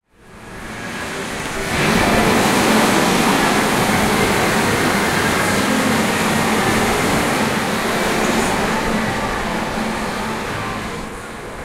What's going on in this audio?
Japan Matsudo Pachinko Doors Open Short 2
I recorded the sound of several pachinko parlors (vertical pinball machines, for recreation and gambling), in Matsudo, Chiba, east of Tokyo. Late October 2016. Most samples recorded from outdoors, so you can hear the chaotic cacophony of game sounds when the doors open.
Computer, Chiba, Stereo, Doors, Japanese, Urban, Casino, Game, Sliding-Doors, Gambling, Pachinko, Cacophonic, City, Noise